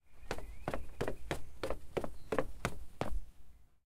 footsteps - wood stairs 01
Walking down wooden stairs with the microphone held to my feet.